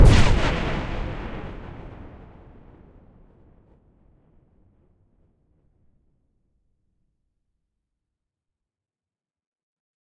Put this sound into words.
A totally synthetic explosion sound that could be the firing of a large gun instead of a bomb exploding. The end result has more of an effect like what you might expect from a tank firing its big gun. Created in Cool Edit Pro.
blast, synthetic